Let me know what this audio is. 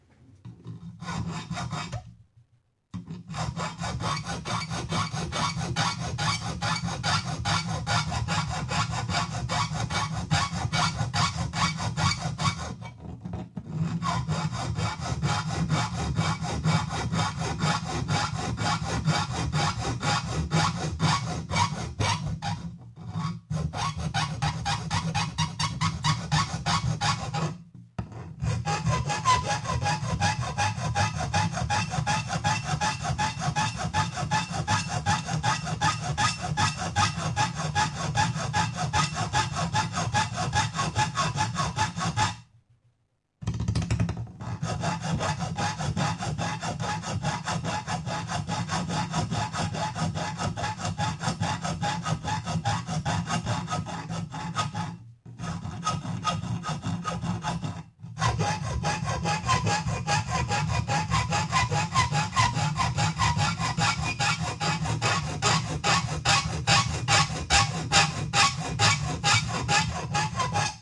Metal File
Noise that is recorded wihile filing aluminium sheet.
Creep, File, Metal, Noise